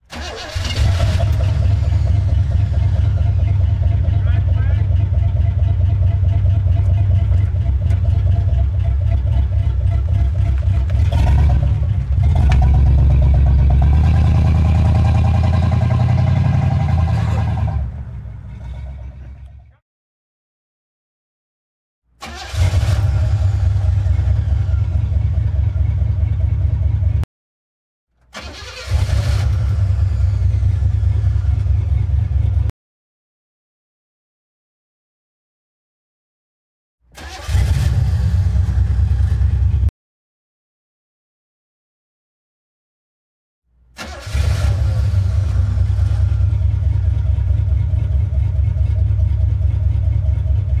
auto old throaty station wagon ext start idle gurgly pull away slowly past mic various
idle, ext, pull, auto, gurgly, throaty, old, station, away, slow, wagon, start